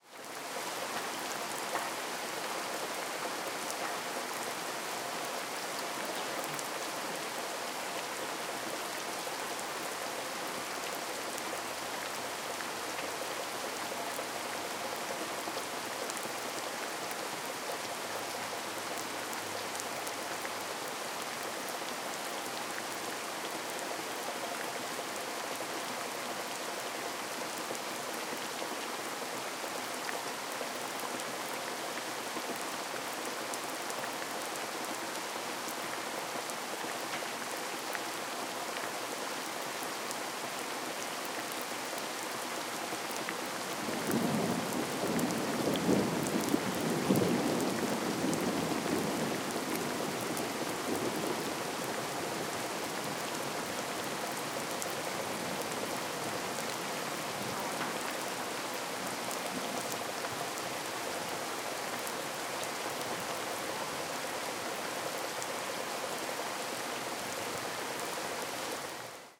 Rain heavy 2 (rural)
Rain dropping heavy. Rural land, without any surrounding sounds. Thunderclap. Useful like FX or background. Mono sound, registered with microphone Sennheiser ME66 on boompole and recorder Tascam HD-P2. Brazil, september, 2013.
atmosphere, background, BG, cinematic, drops, field-recording, FX, heavy, mono, rain, rural, Sennheiser-ME66, strong, Tascam-HD-P2